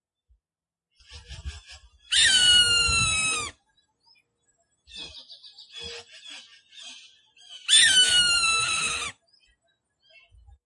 I think this is a Cooper's Hawk. Captured on a cell phone and edited on Audacity.